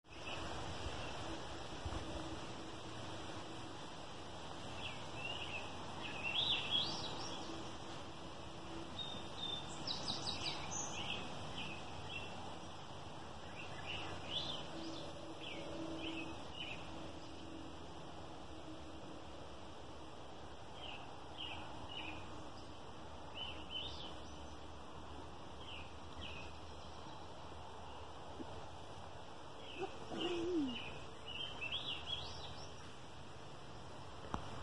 tlf-birds singing 05
birds, bird, birdsong, trail, singing